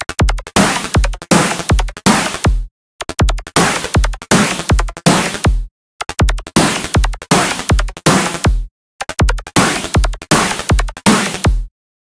20140914 attackloop 160BPM 4 4 loop2.1
This is a loop created with the Waldorf Attack VST Drum Synth and it is a part of the 20140914_attackloop_160BPM_4/4_loop_pack. The loop was created using Cubase 7.5. Each loop is a different variation with various effects applied: Step filters, Guitar Rig 5, AmpSimulator and PSP 6.8 MultiDelay. Mastering was dons using iZotome Ozone 5. Everything is at 160 bpm and measure 4/4. Enjoy!
160BPM, electro, electronic, hard, loop, rhythmic